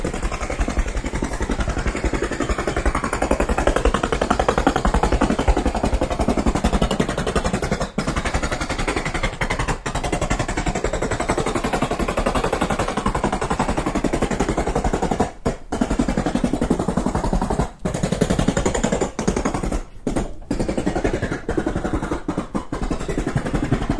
20081108.dancer.machine
engine sound recorded with an Edirol 09 internal mics. Title intends to be humorous, ha ha